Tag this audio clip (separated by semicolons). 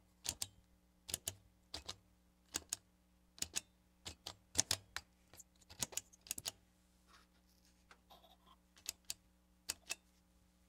switch; click; button; dial